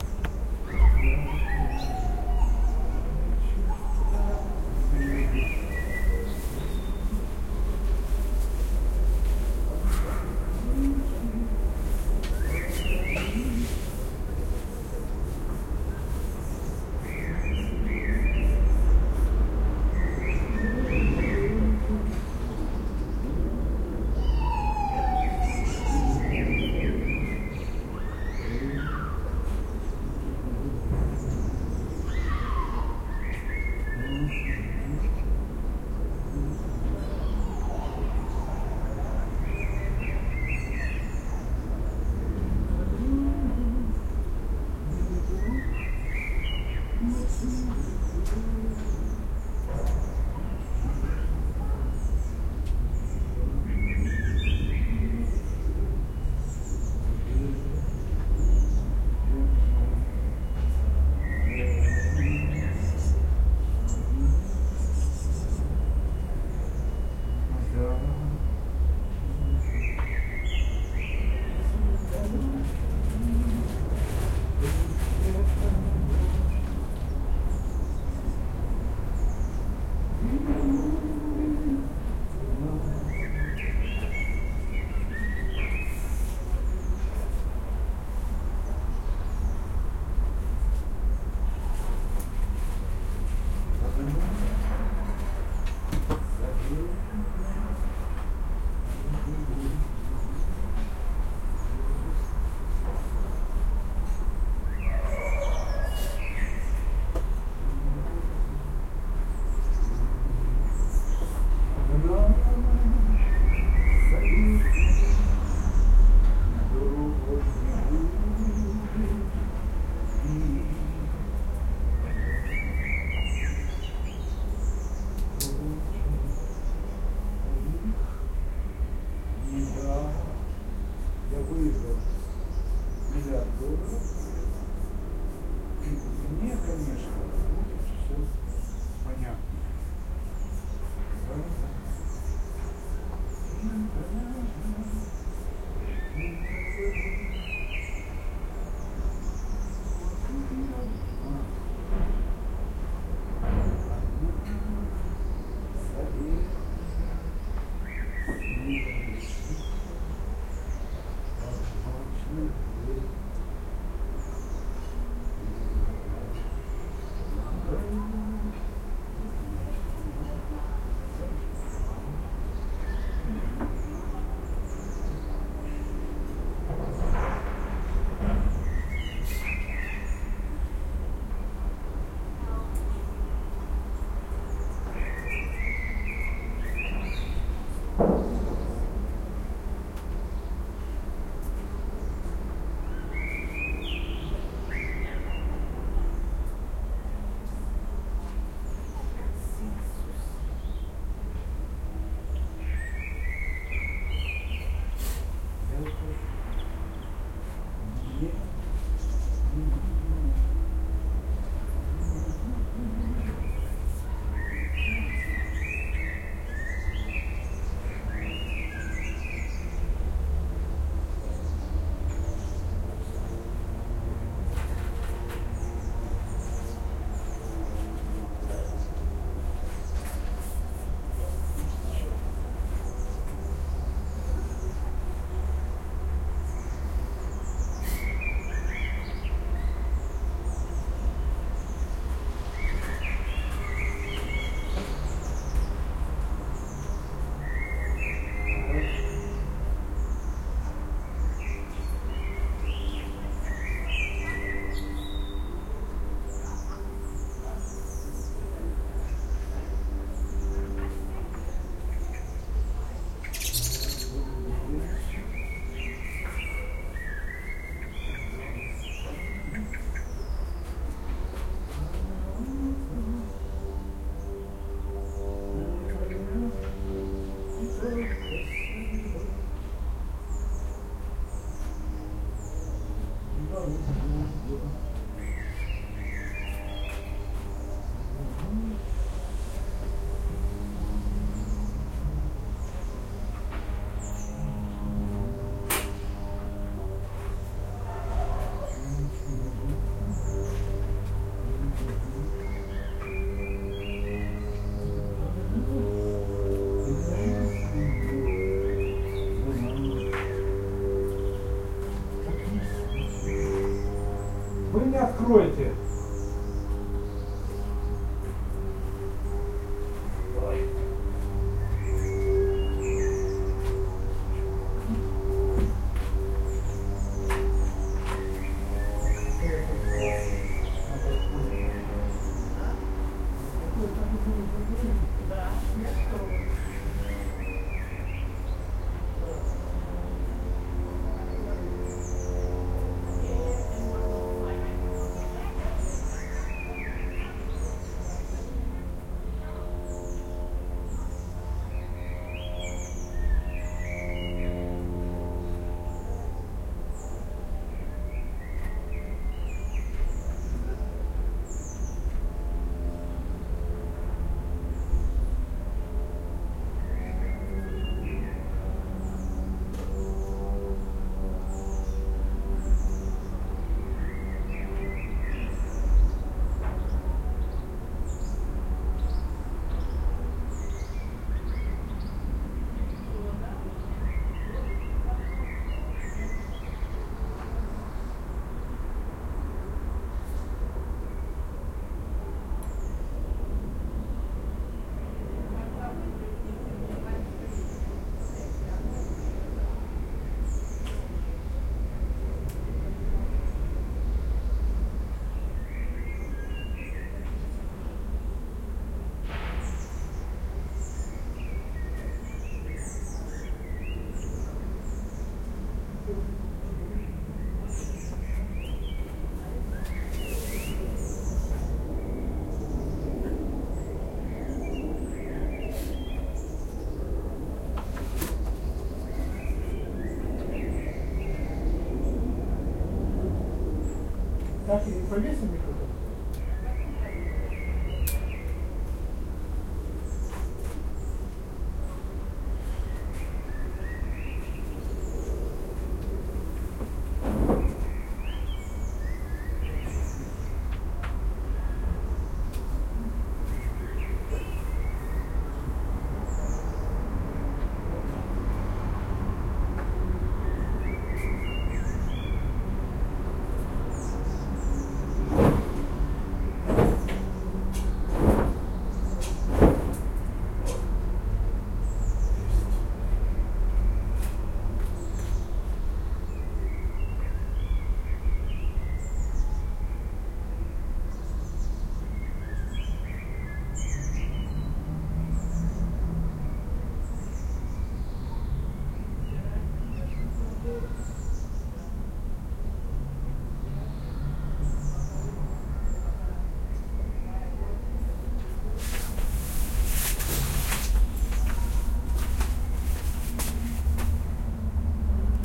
A summer day on my balcony with my neighbor singing some tunes in the background. Recorded in Munich 2013 using Roland R-05